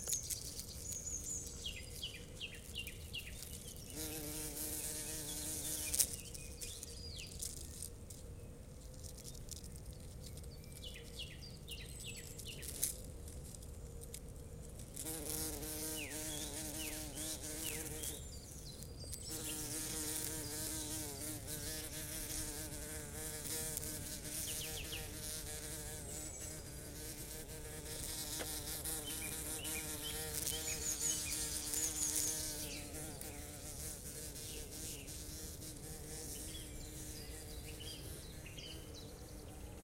Large earth bumblebee (bombus terrestris) female searching the ground for a possible place to build it`s nest.Vivanco EM35 over preamp into Marantz PMD 671.